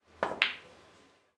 Sound of a single pool shot